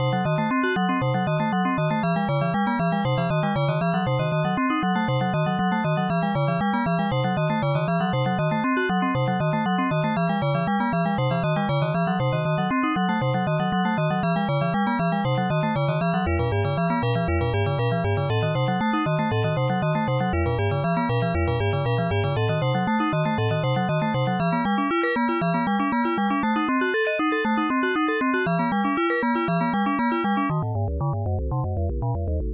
sequence-8tet
Loopable bell-like sequence in 8-tone equal temperament with slight variations of lo-pass filter parameters for each note. All notes are sampled from one wave with harmonics of ratios 1 : 2^(5/4) : 4 : 2^(5/2) : 2^(11/4) : 8 to sound better with the temperament.
Tempo is 118 bpm. Made in OpenMPT.
I’m experimenting with non-standard tunings but hadn’t achieve anything substantial, so here is this. :D Use it for a boss-fight in a game etc..